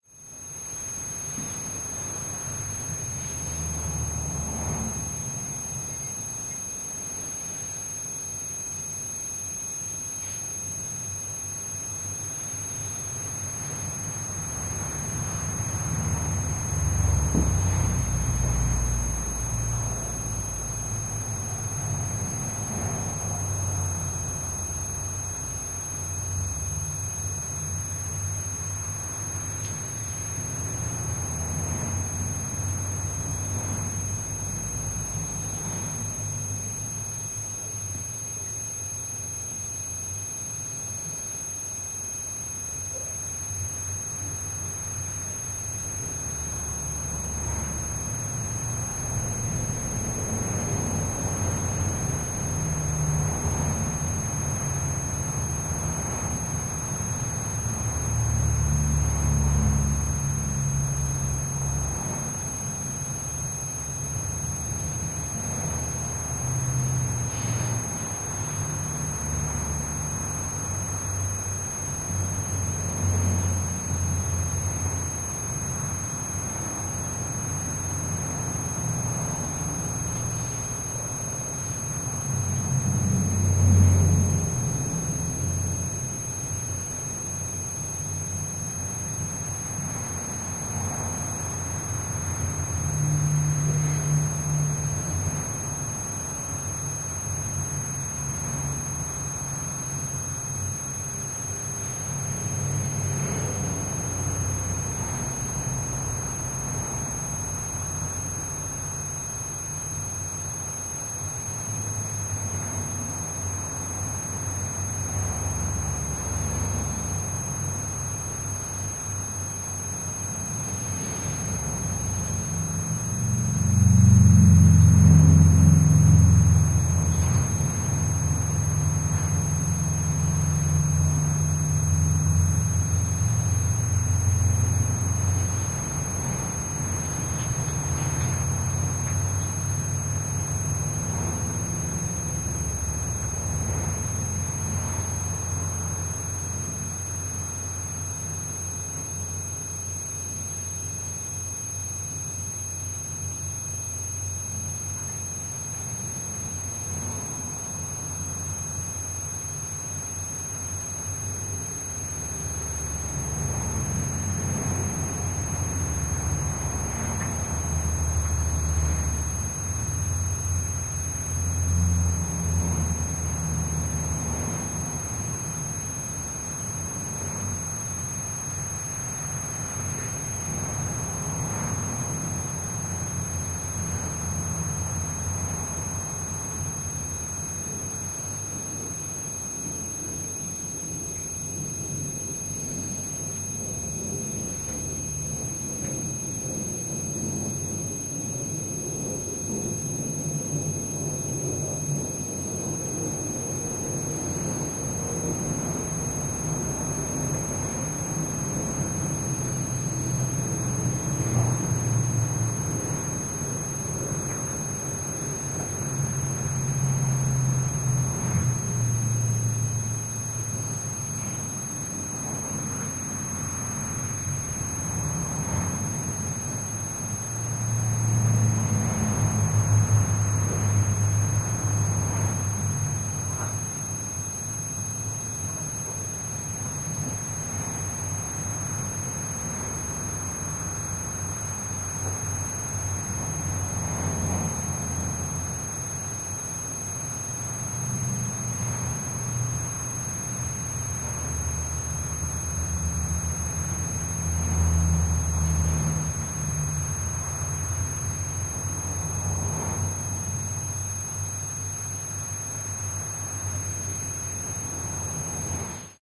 Empty Room Tone 4 - Large room background traffic - good buzz for post sync cover.
Ambiance, Buzz, Reception, Church, Museum, Warehouse, Restaurant, Meeting, Office, Empty, Background, Room, Hotel, Interior, Club, Atmosphere, Apartment, chatter, Library, tone, Casino, hall, House, Airport, College, atmos